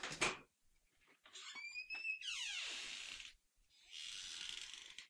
A heavy front door being opened.